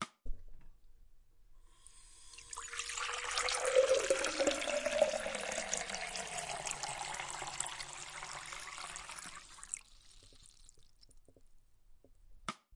Glas get filled with water in Sink